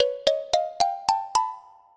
Xylophone for cartoon (10)
Edited in Wavelab.
Editado en Wavelab.
animados, comic, xilofono